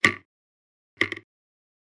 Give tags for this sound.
basic; chime; clock; delphis; fx; tick; ticking; watch

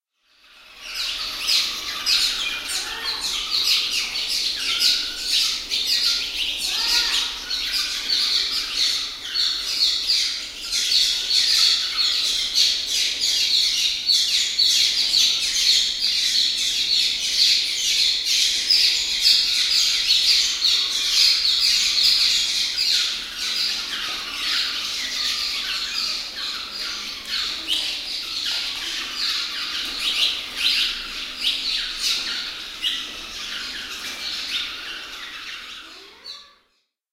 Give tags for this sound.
bird; temple; building; field-recording

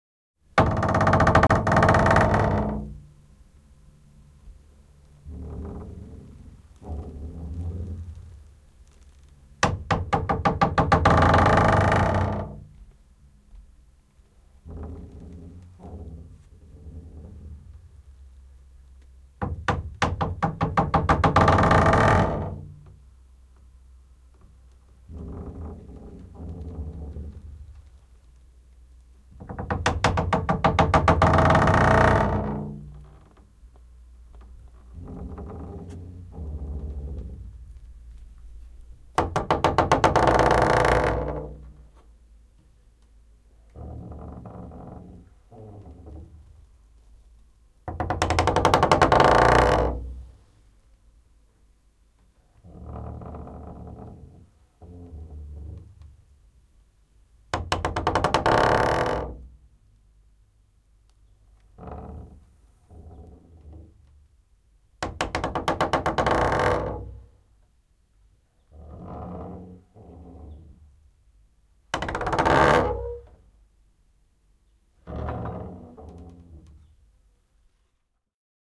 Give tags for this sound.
Yle; Puuovi; Door; Creak; Suomi; Finnish-Broadcasting-Company; Tehosteet; Ovi; Finland; Yleisradio; Narina; Soundfx; Wood; Puu; Field-Recording

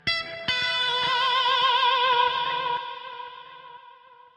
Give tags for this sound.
electronic; guitar; music; processed

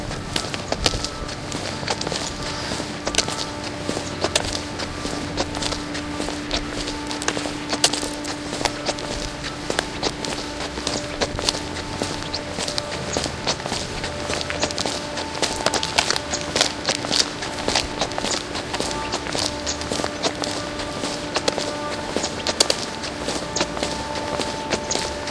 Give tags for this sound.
field-recording; foley; footstep; stereo

